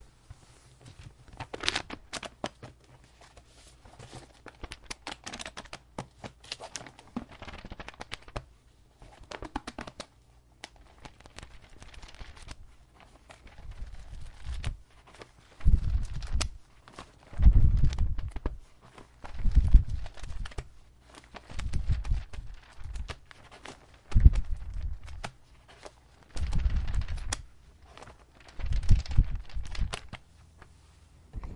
flipping through the pages of a medium sized book
turn, book, page, papers